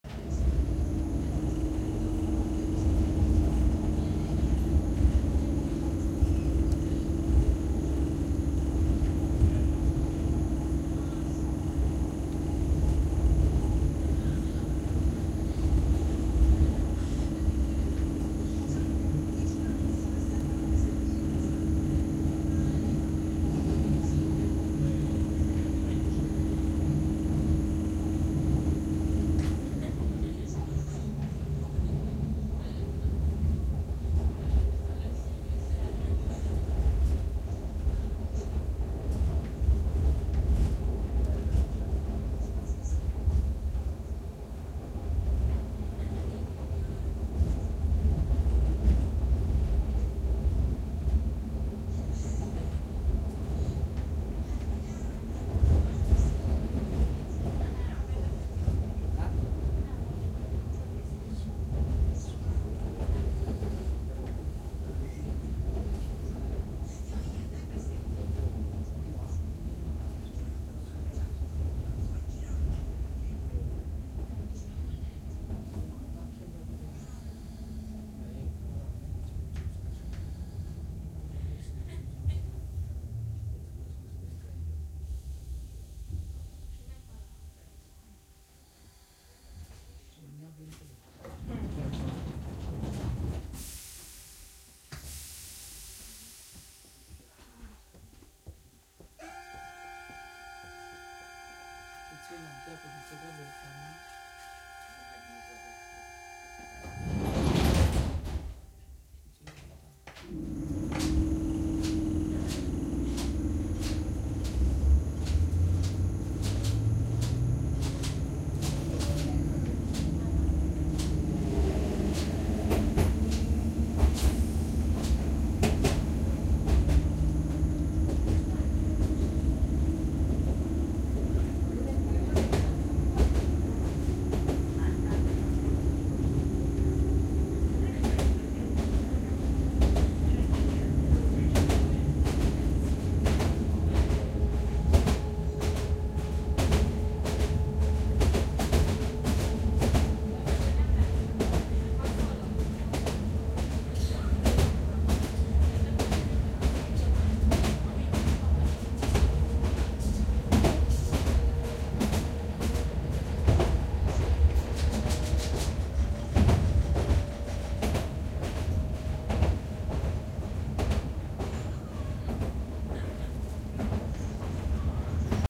Suburb Train Gödöllő
Suburb Train from Gödöllő, Inside, stereo